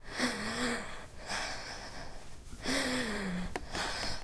5 Heavy Breath
Sound of heavy breath,as if anyone would cost breath, recorded with a tape recorder at the University Pompeu Fabra
exhausted, campus-upf, UPF-CS14, breath